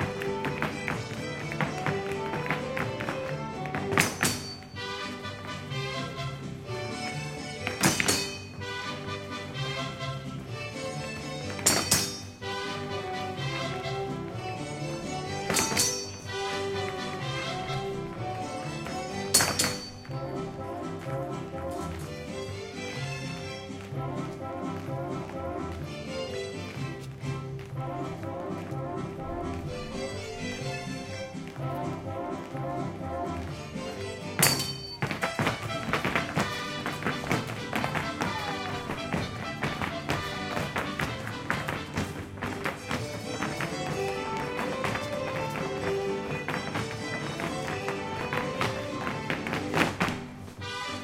Mexican Dance-Street Fair
June 12, 2010 in Cambridge, Mass. While making field recordings, I stumbled upon a street fair where people were performing a Mexican sword dance.
dance, fair, mexican, street, sword